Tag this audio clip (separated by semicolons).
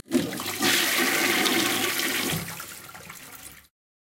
plumbing; washroom; squirt; flushing; toilet; bathroom; restroom; water; flush